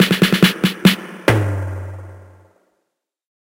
acoustic fills sound-effect